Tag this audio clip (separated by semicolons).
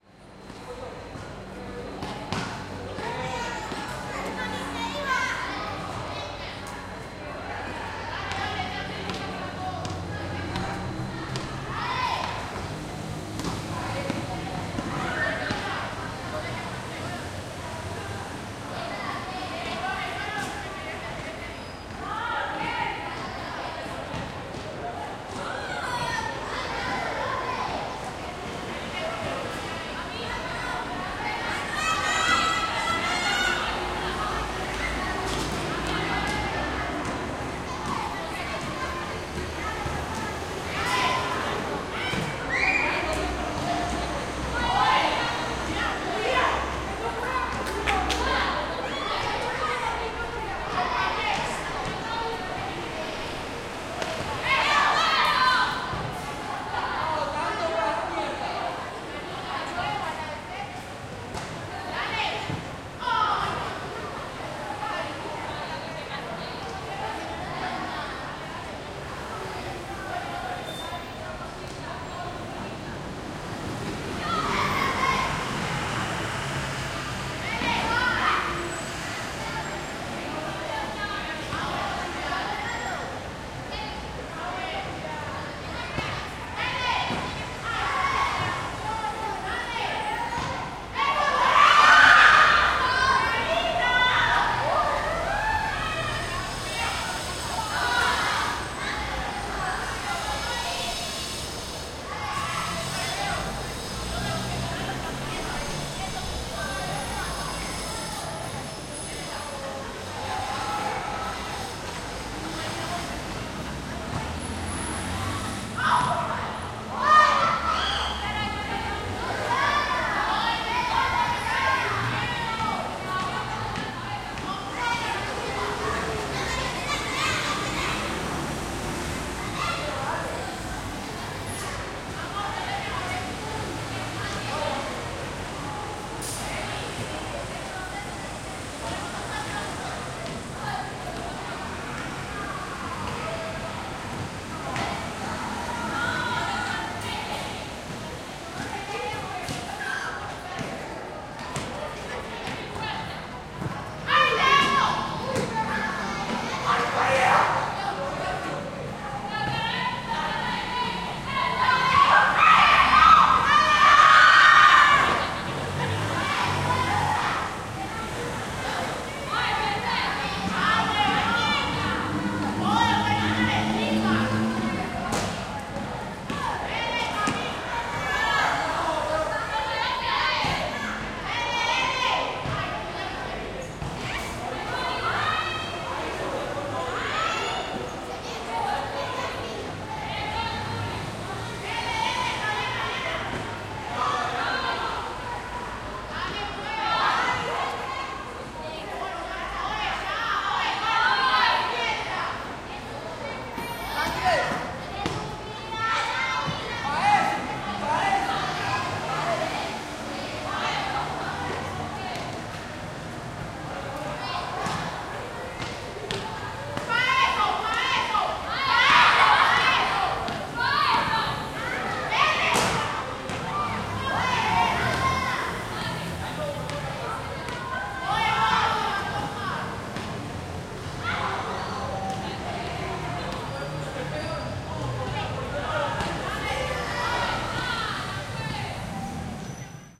Basketball; Kids; School; Outdoors; Playing; Crowds; Ambience